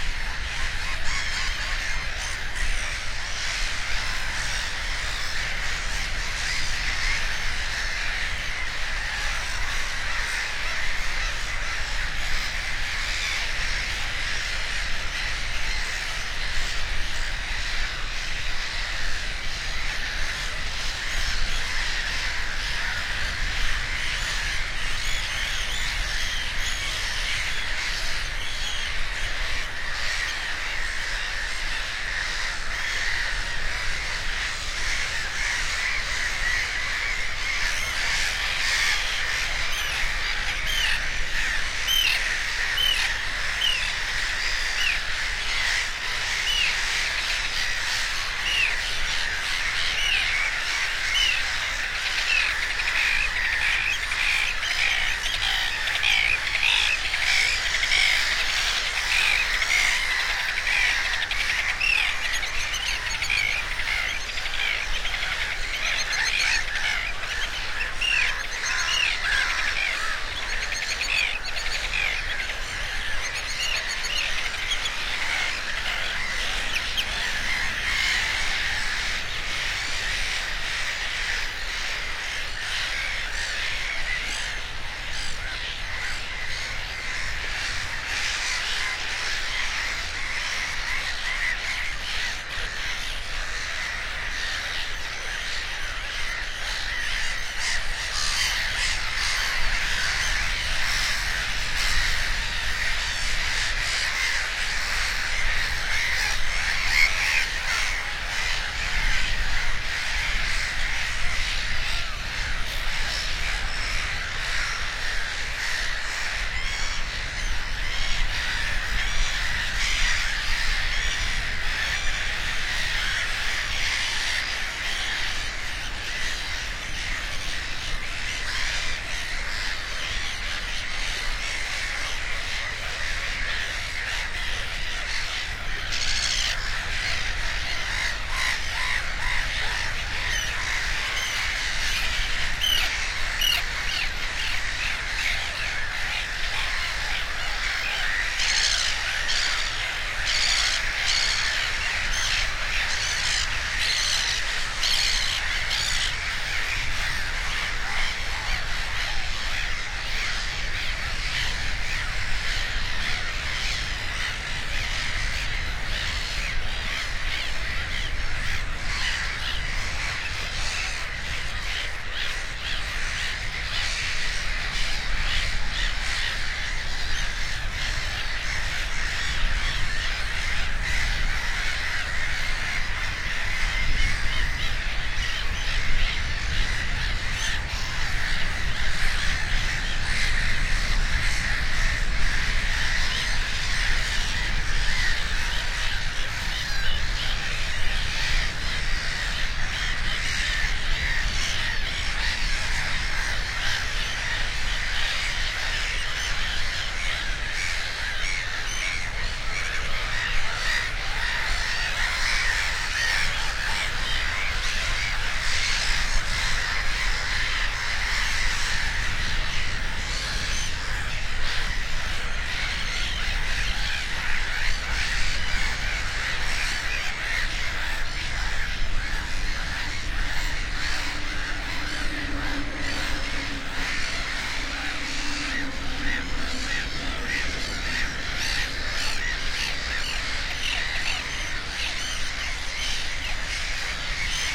The Eider is the longest river of the German state of Schleswig-Holstein. The river starts near Bordesholm and reaches the southwestern outskirts of Kiel on the shores of the Baltic Sea, but flows to the west, ending in the North Sea. There a barrier keeps away the high flood and just next to it was this colony of terns. AudioTechnica AT835ST into Oade FR2-le.
northsea, seeschwalbe, birdsong, sternidae, eider, terns, terner, field-recording, sea, seagulls